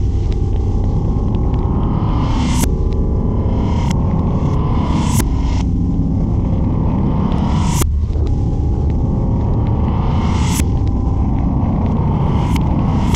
Alien Generator Loop
Alien, Generator, Loop, Machine, Mechanical